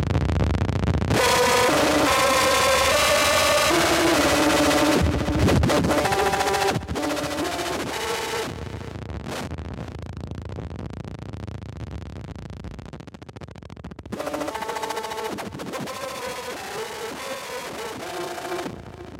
The sound of music dying.
The sound of music dying